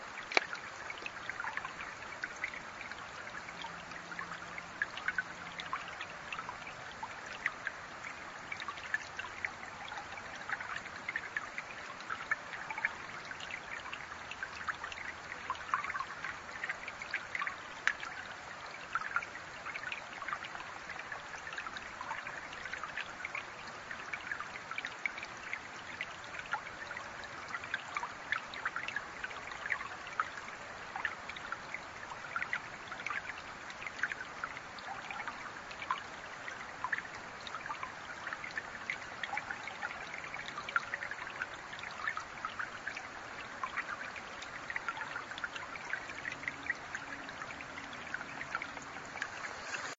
A recording of a small diversion in a stream in the Beamer Conservation Area.
beamerconservationarea, condensormic, creek, field-recording, gurgle, iriver799, smallgurgle, water